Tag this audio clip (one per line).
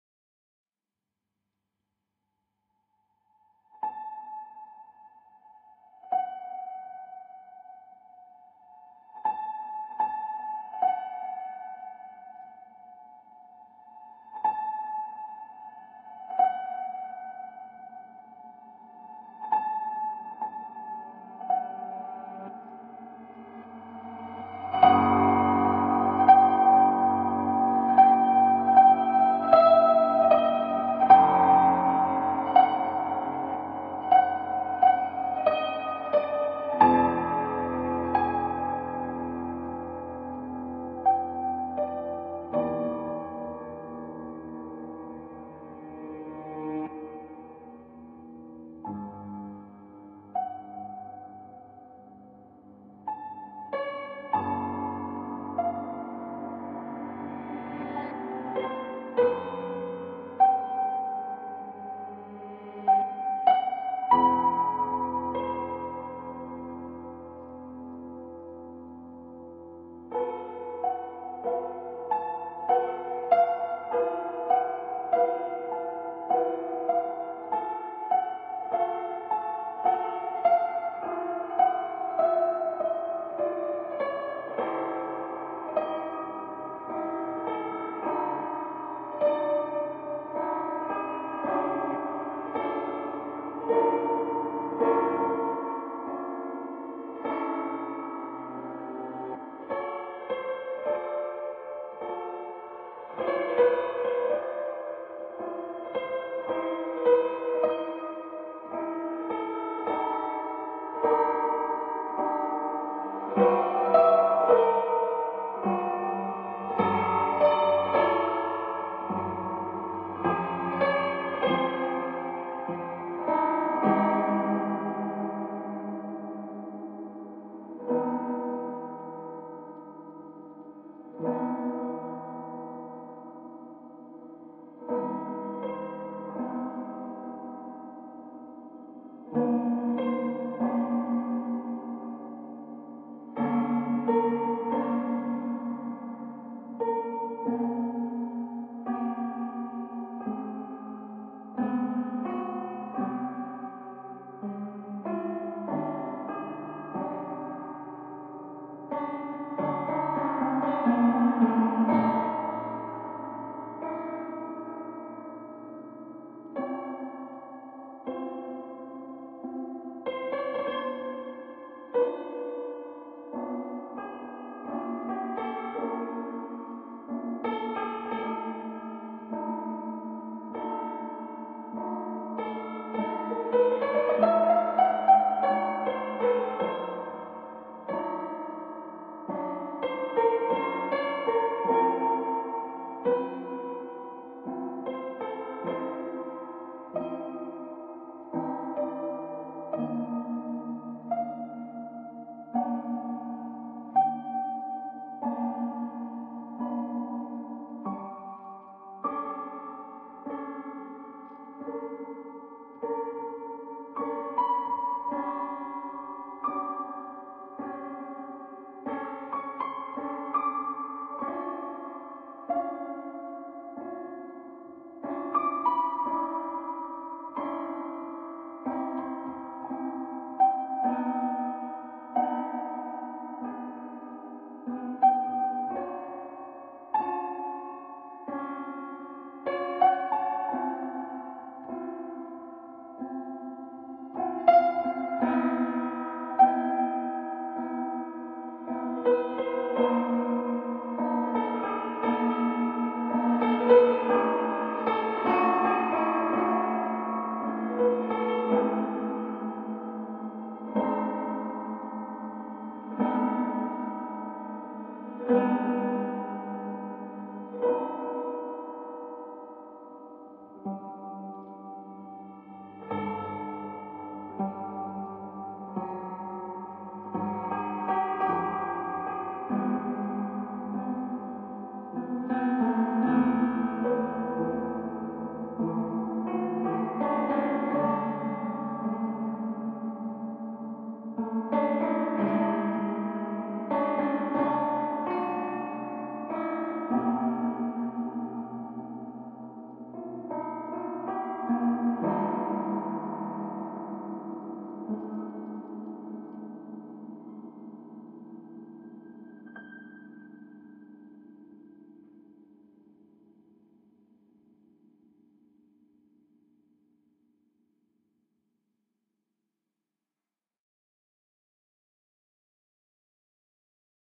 spooky
detuned-piano
disturbing
piano
horror
eery
halloween-special
ghostly
processed
halloween
old
ghost
doom